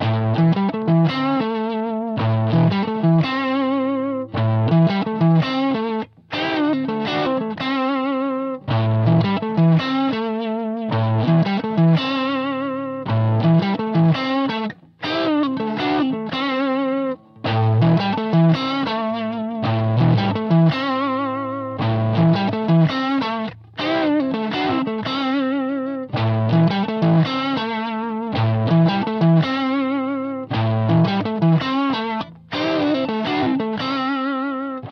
Blues Tele A@110 BPM
Blues riff played on Telecaster in the key of A at 110 bpm. First half is softer, second half is a bit harder. Can be looped.
110bpm, guitar, riff, blues, telecaster